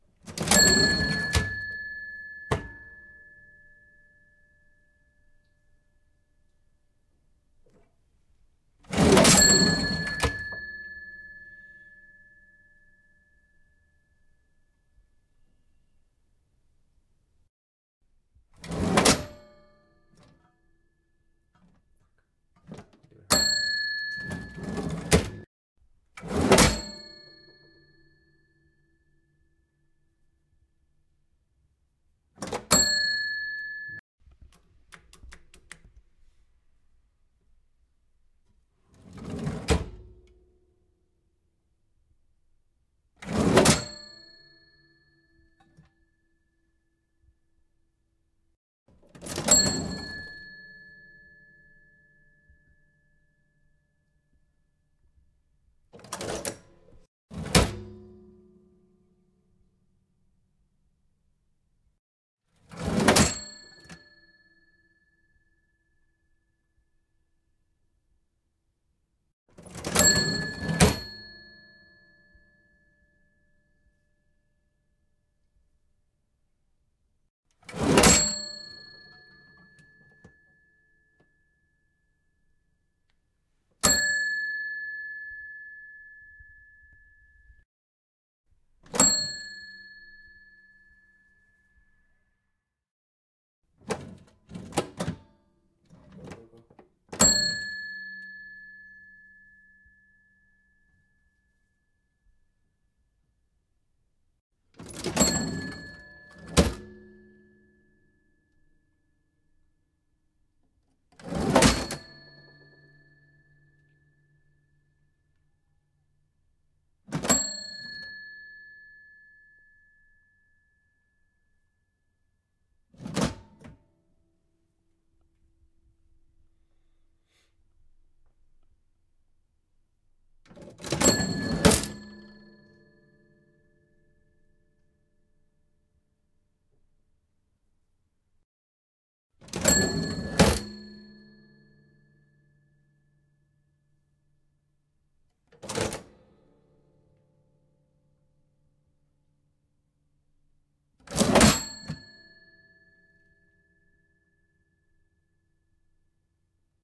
cash register old antique open close drawer with bell ring various combinations
antique, bell, cash, close, drawer, old, open, register, ring